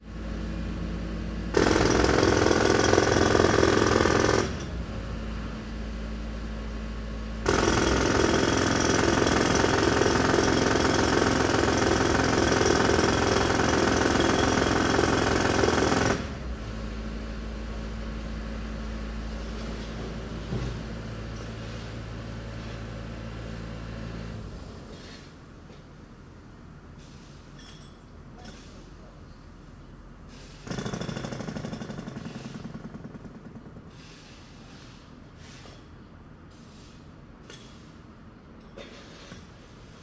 Jack Hammer
This morning I woke up to the sound of a city crew jackhammering concrete just outside my window. I grabbed my H2 and recorded for a while. This file is an edit of the full recording. It contains two bursts of jackhammering, one fairly short and one longer. The background noise is the sound of the air compressor. Toward the end the compressor is turned off and the last of the pressure is released by the jackhammer as it sort of "putters out". This last bit is a bit unique
jackhammer, hammer, construction, concrete, jack, sidewalk, sewer, city